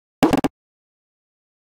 nep trommel
minimal, percussion